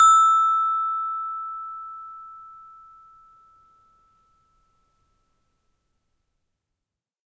children instrument toy xylophone
children, instrument, toy